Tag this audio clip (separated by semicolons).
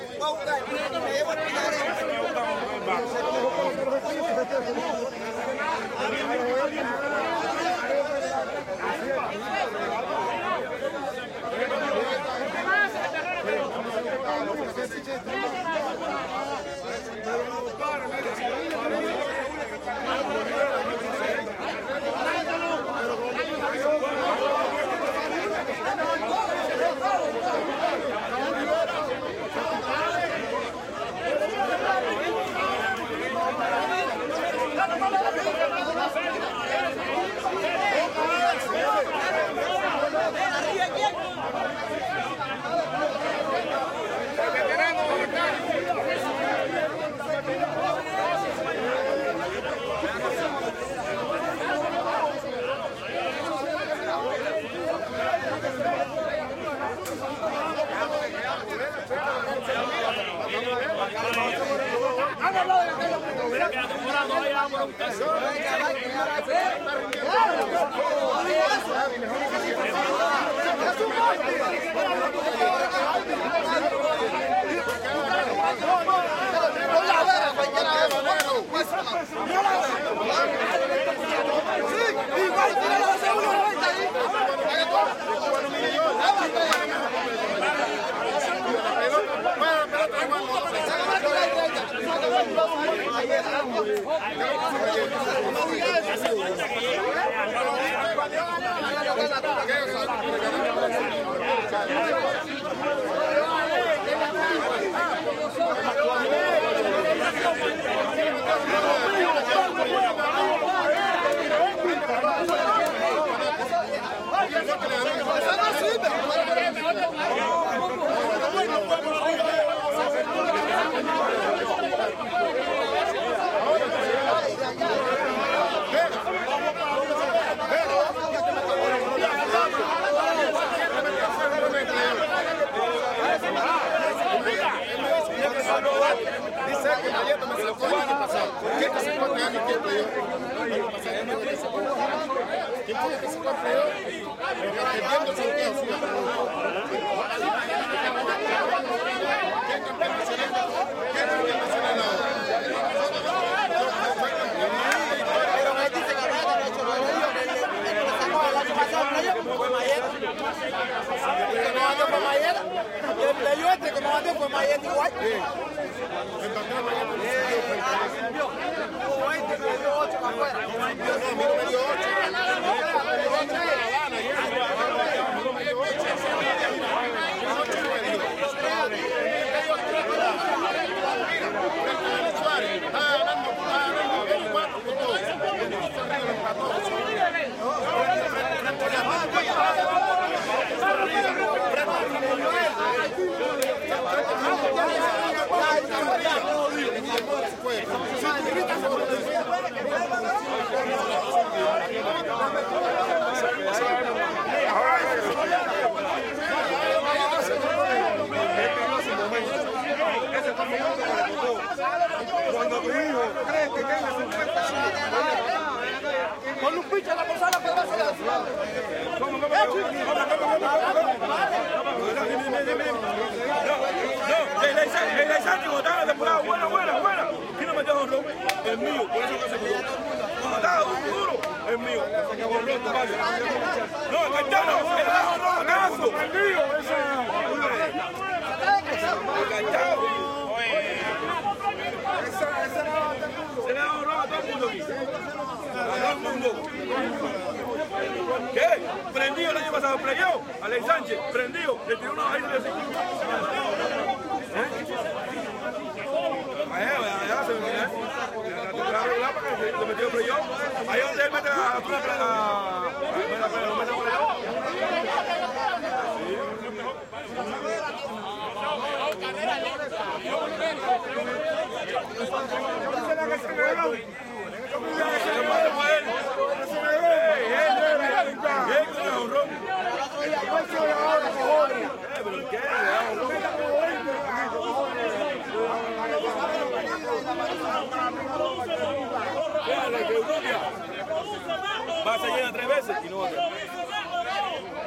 arguing; basketball; crowd; Cuba; Cubans; ext; loud; medium; men; shouting; walla